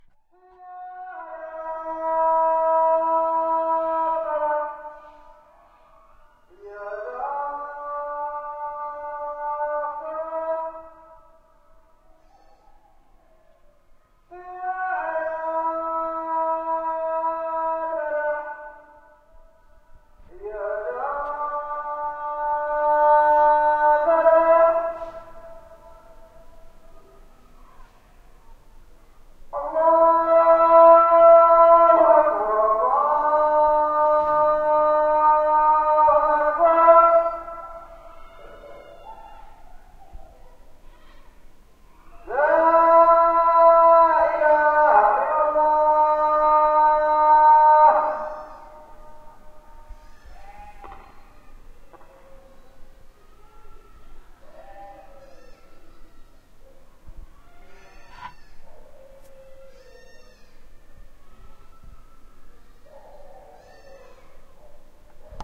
Call to prayer in Morocco. Recorded with PCM-D50